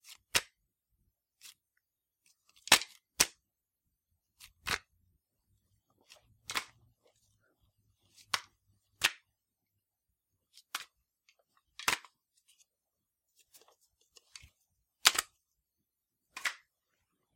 Electronics-Flashlight-Plastic-Handled
This is the sounds of a small flashlight being tossed about, jiggled around, and generally handled.